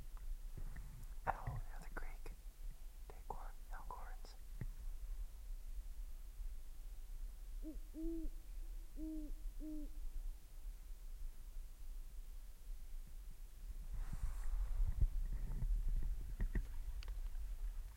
Owl in the Elkhorns
owl, elkhorns